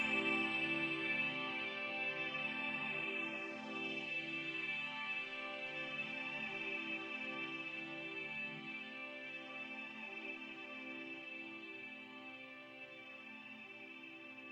trippy stereo phased chorused end
end, phase, trippy